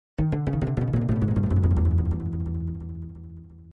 Someone's Coming!
deep, horror, piano